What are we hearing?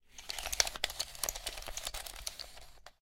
06 garra mecanica
banda, garra, transportador